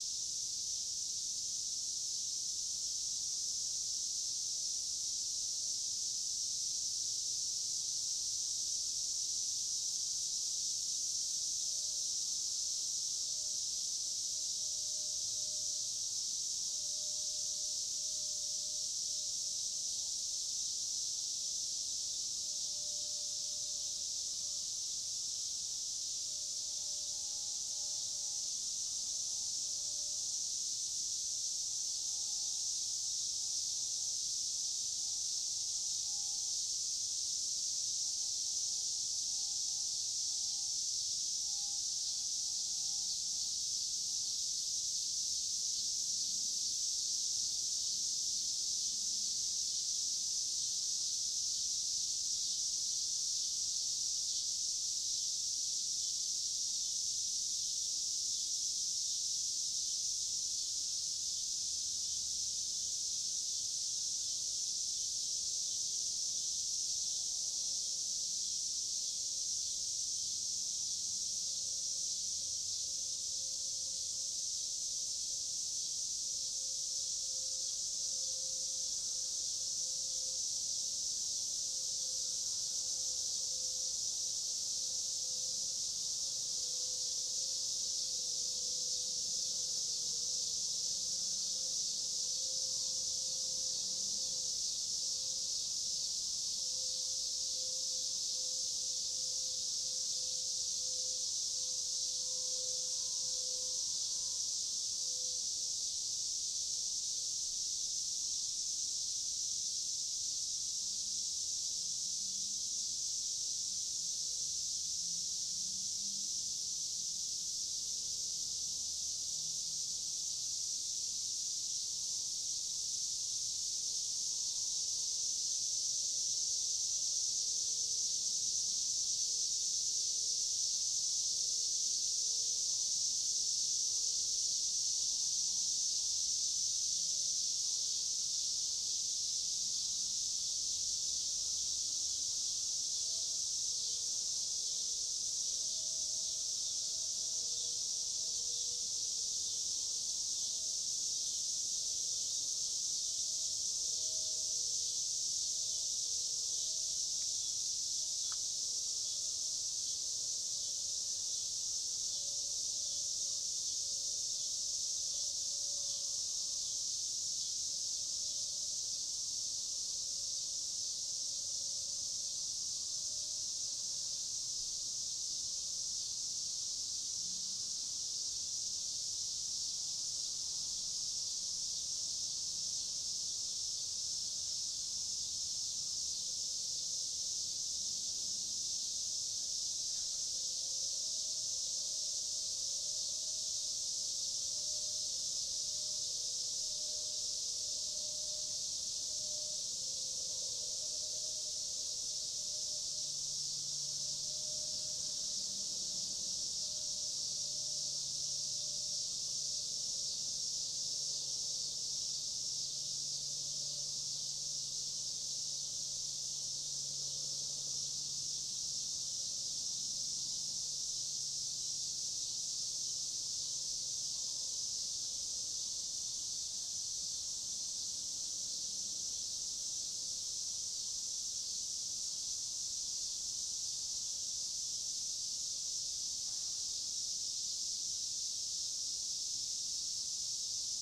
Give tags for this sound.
Central-Illinois
cicadas
cicadas-singing
Midwest
summer

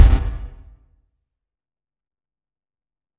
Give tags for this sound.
error
fail
glitch
mistake
problem
wrong

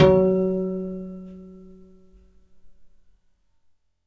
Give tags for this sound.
detuned
piano

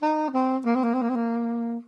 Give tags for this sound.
loop
soprano-sax
soprano
saxophone
sax
melody
soprano-saxophone

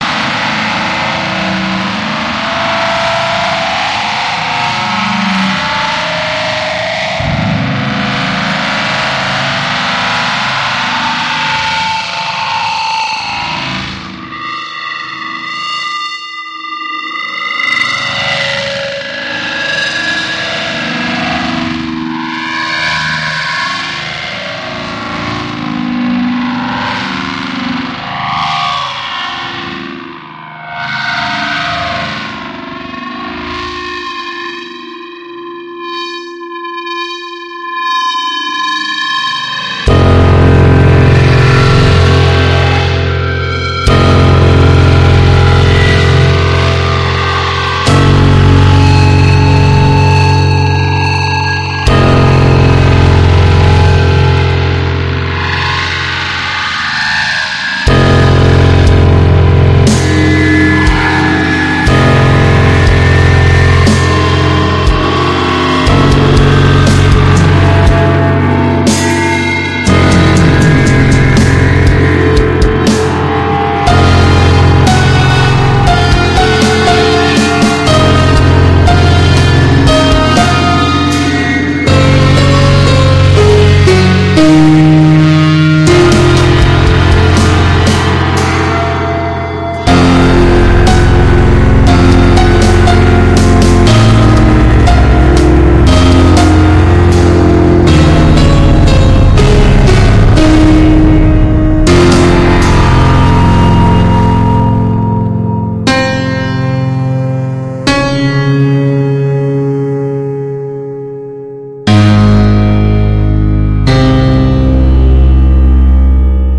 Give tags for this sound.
distortion
piano
game-dev
dark
intro
feedback
drum
intro-music

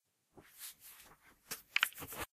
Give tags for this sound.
camera
off
turning

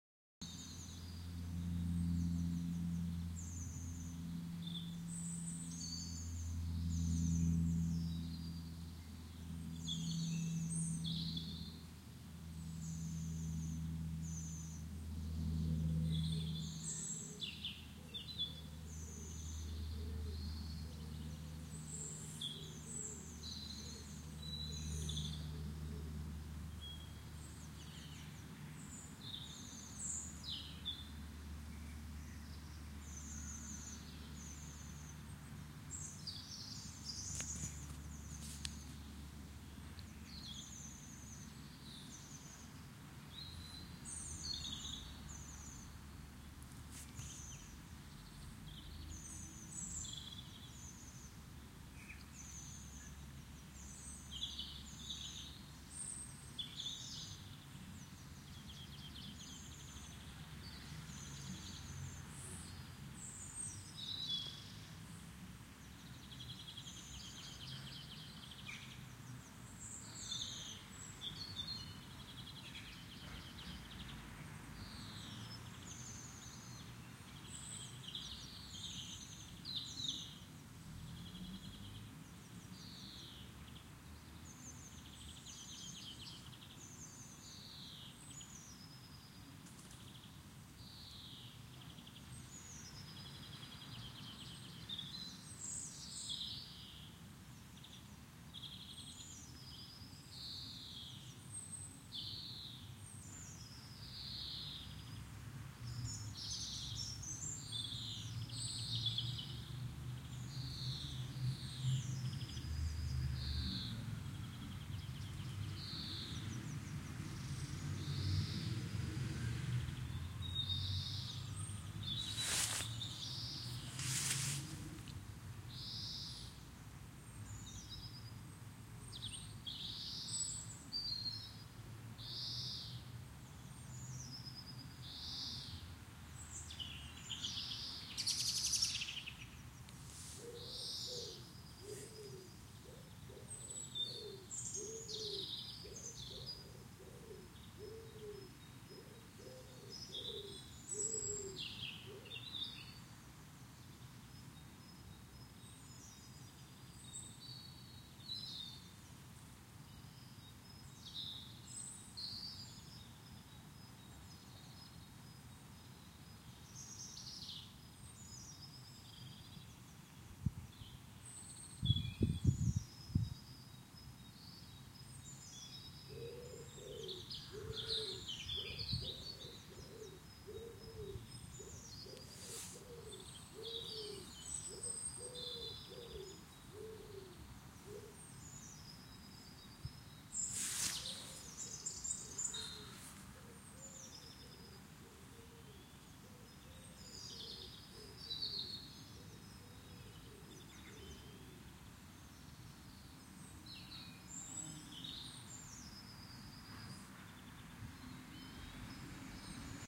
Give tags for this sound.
animals
nature
birds
field-recording

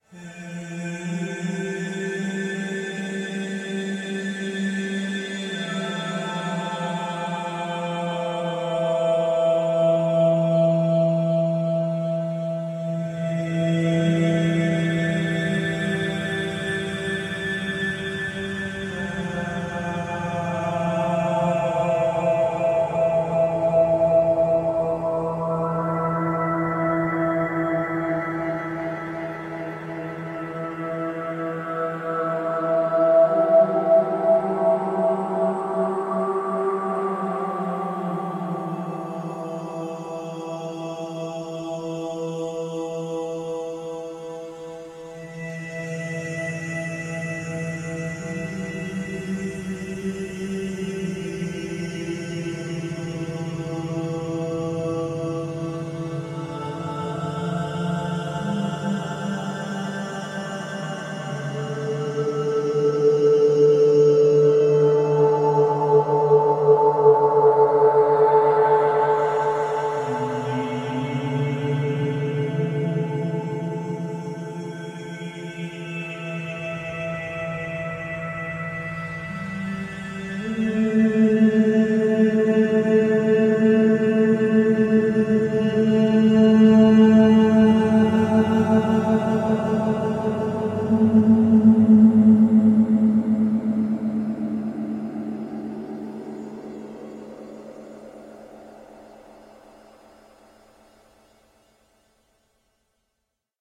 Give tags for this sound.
singing
soundscape
vocal

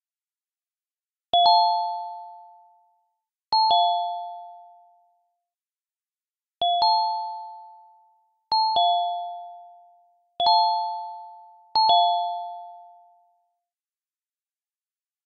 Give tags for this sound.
chime
ping

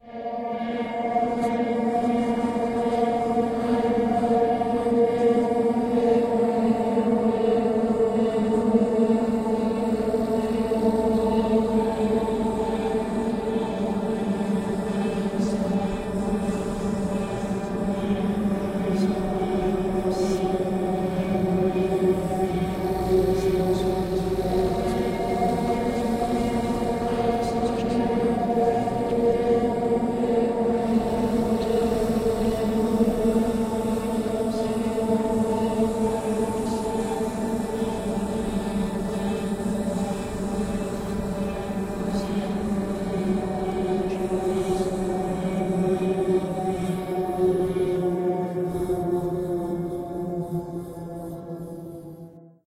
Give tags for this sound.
Ambiance
Ambience
Ambient
Atmosphere
Cellar
Cinematic
Creature
Creepy
Dark
Drone
Engine
Entrance
Evil
Fantasy
Film
Free
Ghost
Hall
Halloween
Horror
Light
Maker
Movie
Nightmare
Passing
Public
Scary
Shadow
Sound
Spooky

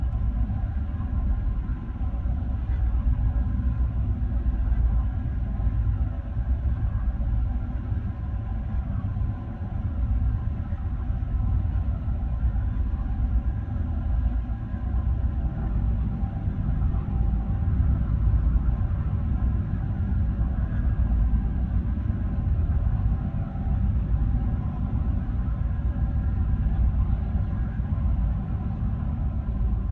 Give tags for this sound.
Ambience
creepy
Room

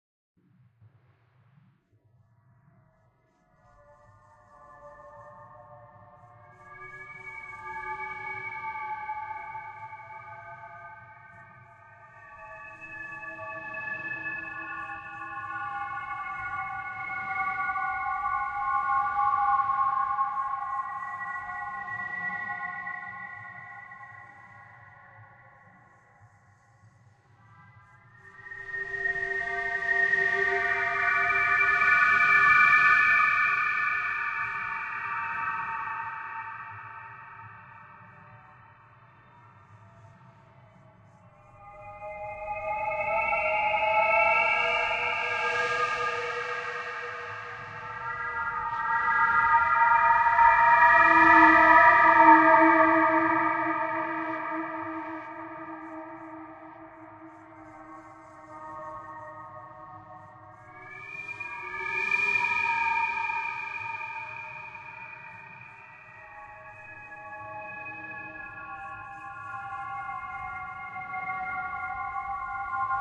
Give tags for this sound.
Scary
Atmosphere
Cinematic
Ambient
Amb
Ambiance
Ghost
Environment
Sound

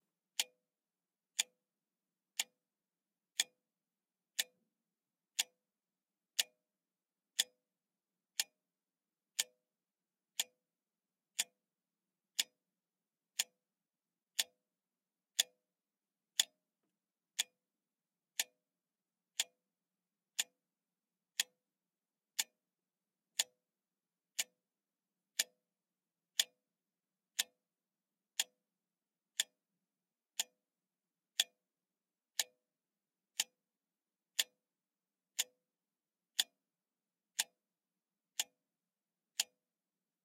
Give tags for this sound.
tap
time
seconds
clock
tick-tock
tick
environmental-sounds-research
clack
battery-powered
click
electromechanical
ticking

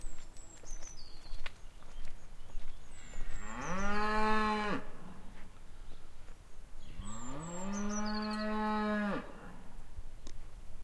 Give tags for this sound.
cattle
countryside
cow
farm-animals
moo
mooing

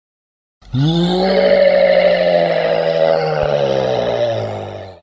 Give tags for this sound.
Creature
Growl
Monster
Roar
Slow

